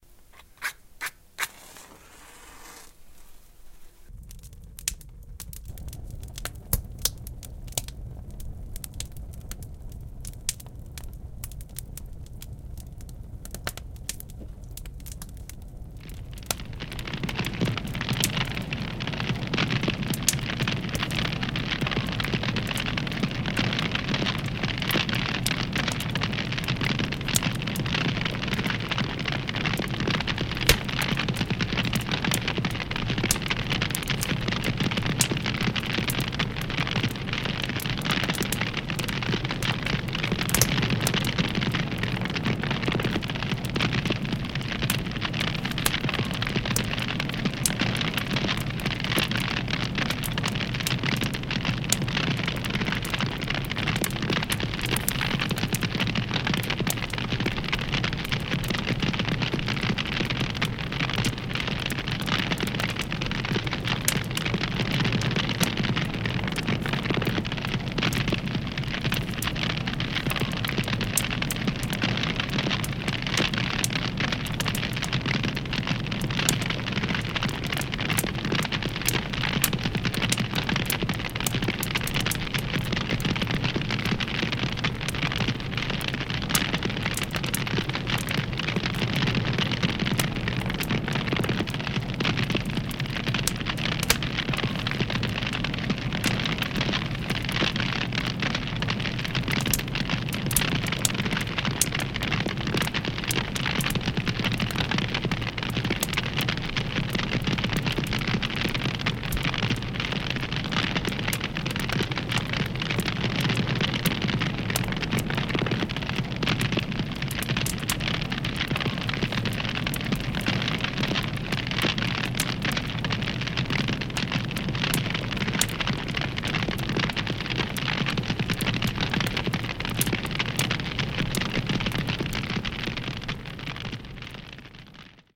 combination og these sounds:
making the fire with match:
fire sounds: